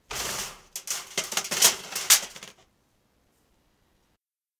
Raw recording of aluminum cans being thrown at a tin heating duct. Mostly high-band sounds. Some occasional banging on a plastic bucket for bass.
252basics, bang, can, collapse, crash, fall, steel